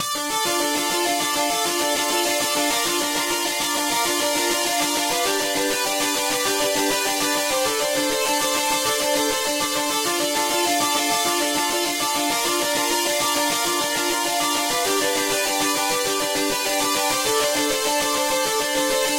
Distorted Synth Atmoslead
Ambient arp lead thing. Could probably pass for a guitar. Created in Logic Pro X by adding distortion, stereo spread, delay, and reverb from Kontakt Guitar Rig to a modulated triangle wave in Serum.
100-bpm, 100bpm, ambient, arp, arpeggiator, atmosphere, D, delay, Distortion, electric, electronic, guitar, key-of-D, lead, loop, loops, metal, music, reverb, rhythmic, riff, stereo, synth, synthesizer